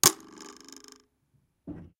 Recorded knifes blades sound.